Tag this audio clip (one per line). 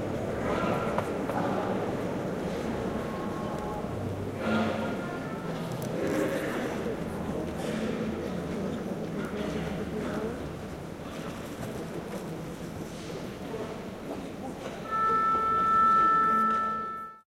concert
music
stalls
performance
murmur
theater
ambiance
orchestra
field-recording